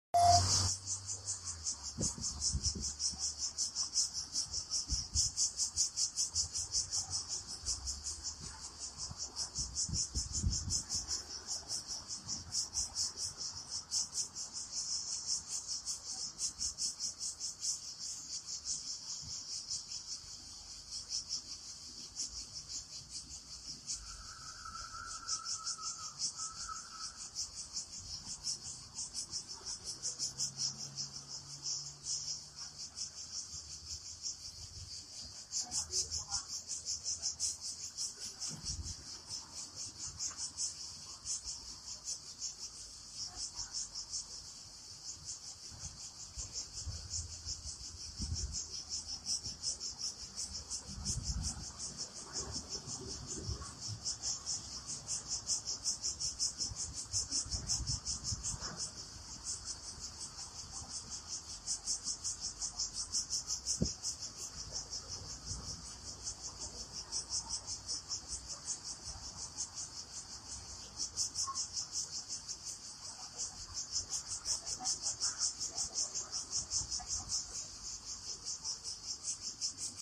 Cicadas summer song on pines.
Greece, Cicadas, heat, hot, summer